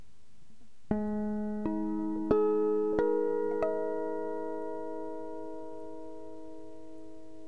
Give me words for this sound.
Pizzecato Aadd9 overtone
Recorded through audacity on linux. An Aadd9 chord, made by overtones.
chord, experiment, guitar